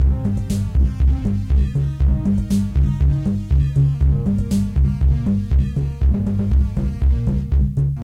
Lofi recording, analog Yamaha MR10 Drum Machine raw beat with virtual analog synth. 80's classic drum machine. Grimey, distorted.